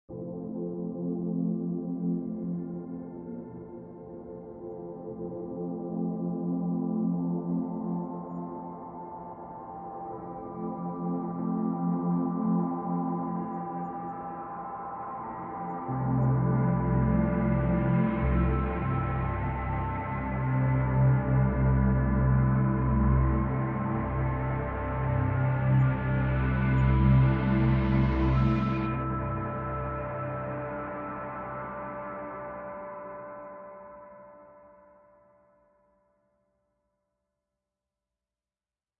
Space ambience: Storm cloud, atmospheric. Wind audio, sfx, dark. Recorded and mastered through audio software, no factory samples. Made as an experiment into sound design. Recorded in Ireland.
electronic-pad, effect, soundscape, noise, dark, audio-effect, ambience, electronic, atmosphere, processed, ambient, sfx, msfx, sample, space-ambience, sci-fi, light, field-recording, distorted